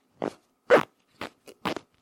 pulling jacket zipper